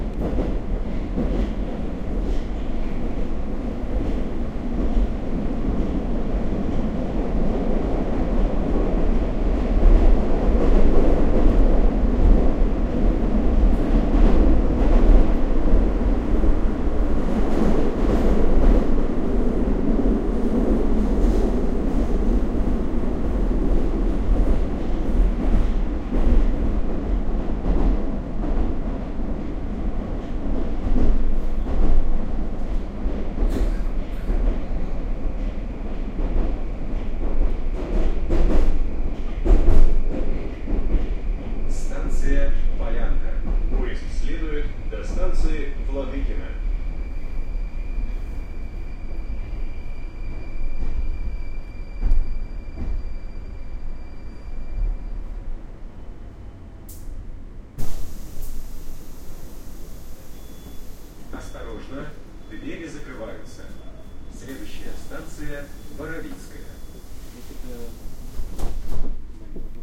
Moscow subway ambience omni
Inside the train in the Moscow Subway (Metro)
Recorded on Roland R-26 with omnidirectional mics
underground, metro, subway, field-recording, Moscow, ambience, train